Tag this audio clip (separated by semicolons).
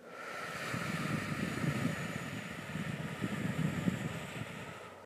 naturaleza; viento; ciudad